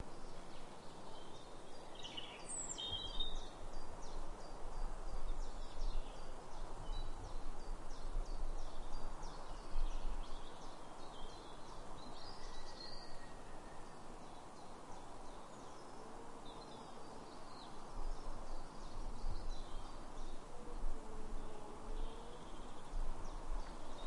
Sk 310308 3 chiffchaff woodpecker
A spring day in late March 2008 at Skipwith Common, Yorkshire, England. The sounds of a chiffchaff, a distant woodpecker, and general woodland sounds including a breeze in the trees.
ambience, atmosphere, bird, bird-song, field-recording